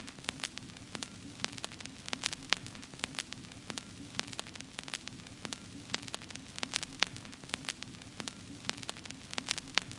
Vinyl Dust 001
turntable, dust, static, hiss, noise, crackle, Vinyl